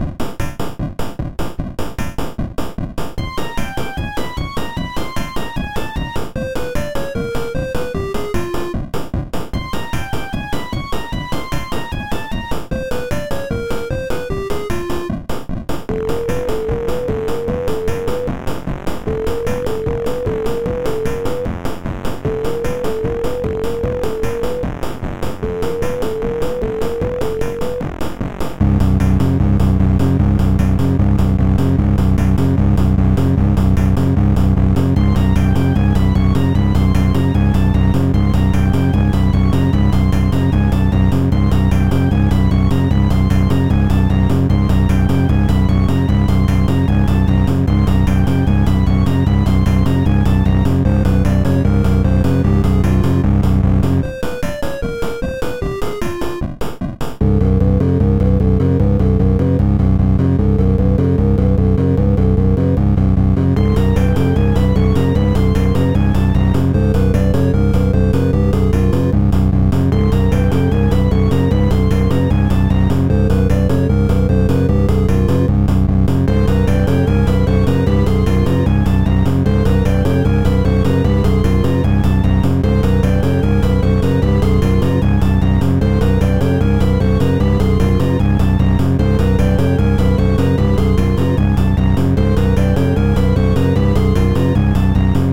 Eternal Madness
game, arcade, ambience, melody, retro, music, 8bit, background, video-game, soundtrack, loop